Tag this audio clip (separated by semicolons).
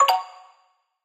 FX; Notification